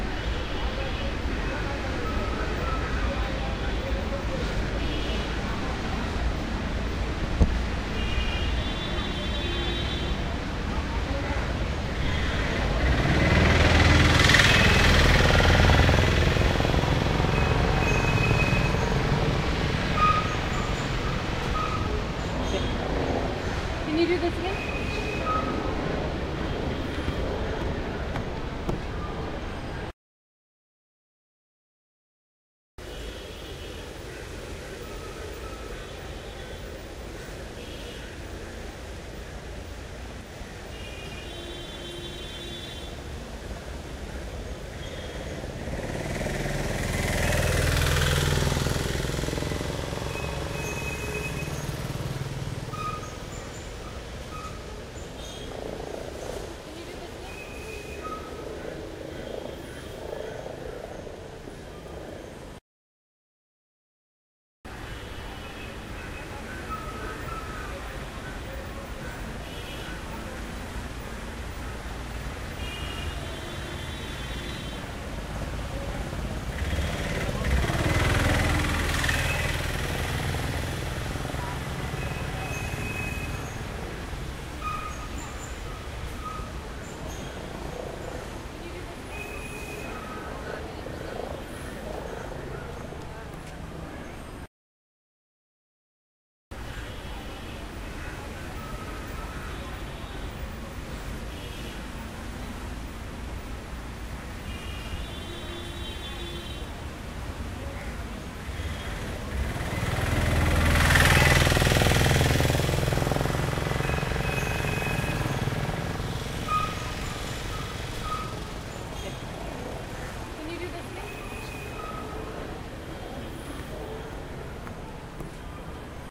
motorcycle moped throaty real pass by slow or medium speed uphill 4 different mics tones bgsound distant India
by, India, medium, moped, motorcycle, or, pass, real, slow, speed, throaty, uphil